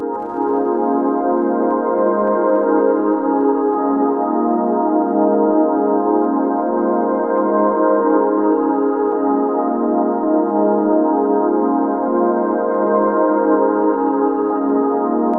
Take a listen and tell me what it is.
One in a series of strange ambient drones and glitches that once upon a time was a Rhodes piano.